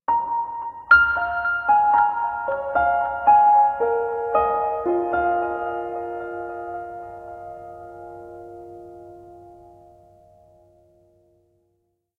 Long, developing sequence, part of Piano moods pack.
phrase, piano, reverb, sequence